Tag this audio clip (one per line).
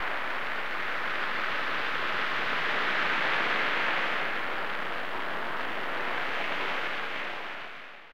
Dust
Noise
Reaktor
Space